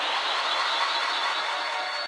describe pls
Using LMMS and Valhalla Supermassive, I sampled part of my own song and turned it into a few seconds of spacey ambience.
electronic, riser, space, space-pad, synth, UFO